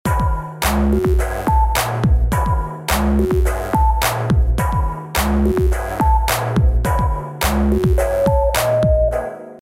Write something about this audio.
This is another club beat for my Mr.Dub Pack Which Was Recorded at 106bpm